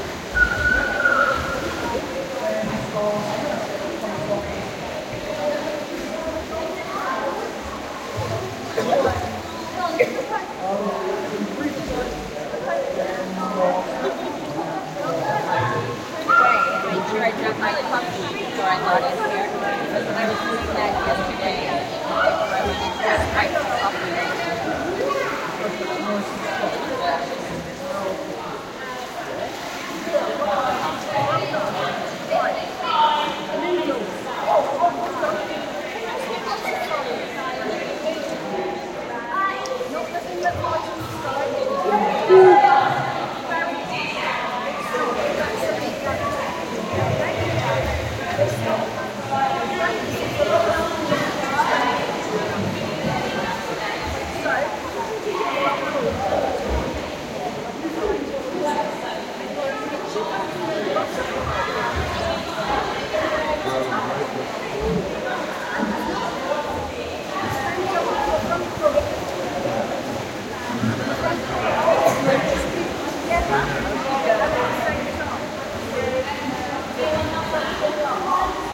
swimming pool lessons
Short recording of very busy pool with multiple lessons. England
pool, children